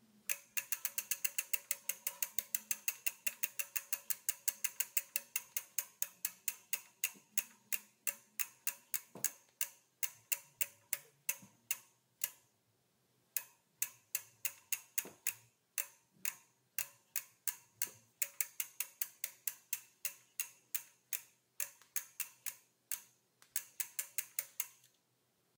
Bicycle rear wheel spinning freely, varying speeds